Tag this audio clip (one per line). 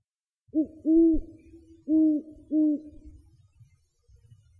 clean
hoot
owl